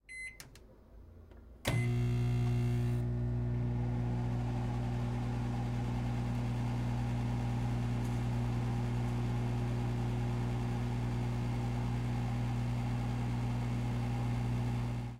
Microwave; medium distant
Recording of a microwave running.
kitchen, microwave, running